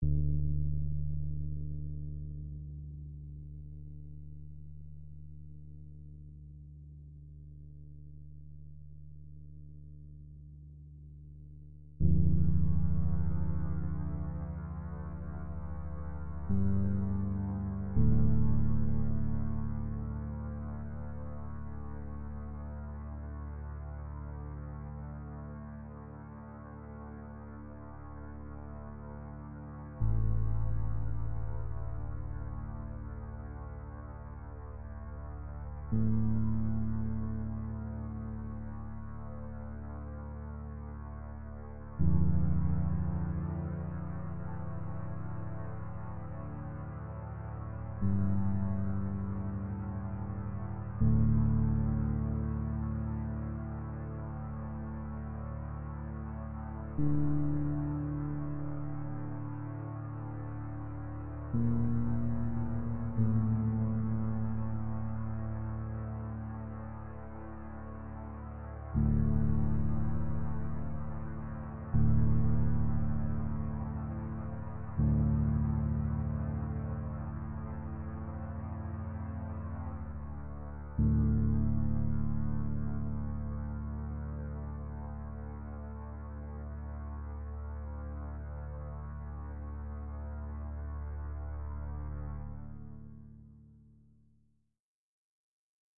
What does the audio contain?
Ambience for a musical soundscape for a production of Antigone
ambient, bass, dark, deep, musical, pad, soundscape